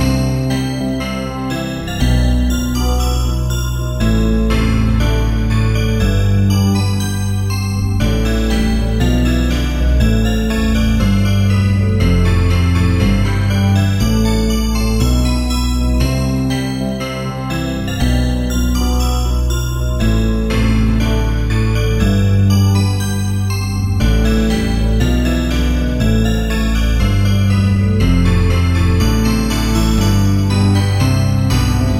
Short loops 14 03 2015 5
made in ableton live 9 lite - despite many crashes of ableton live 9 lite
;the program does not seem to work very well on my pc - luckily the program has
built in recovery for my midi projects after crashes occur.
- vst plugins : Balthor, Sympho, Alchemy, ToyOrgan, Sonatina Flute- Many are free VST Instruments from vstplanet !
bye
gameloop game music loop games organ piano sound melody tune synth ingame happy bells
sound, synth, music, bells, loop, happy, tune, game, ingame, gameloop, melody, organ, games, piano